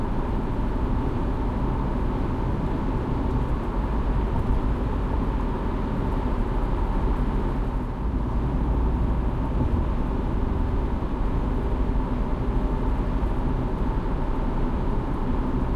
car atspeed loop
Honda CRV, driving on a highway at 100 km/h. Sound is looped. Recorded with a Zoom H2n.
car, driving, loop